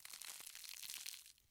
Flesh, intestines, blood, bones, you name it.
intestines, flesh, tear, gore, blood